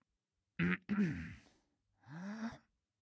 Murmur before starting a speech or sentence. Clearing throat and taking a breath.